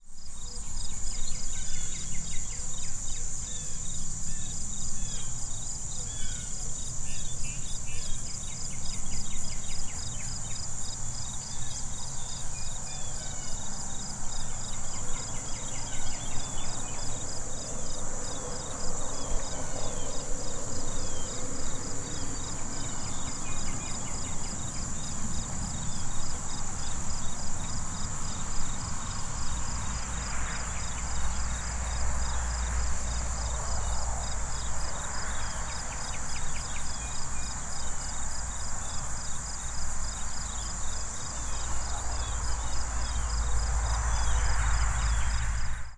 Ambience Farm 01
ambience, farm, field-recording